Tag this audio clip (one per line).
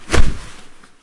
hit
hand
melee
attack
leg
swish
woosh
punch
smash
fight
kick
block
smack
fist
body
slap
flesh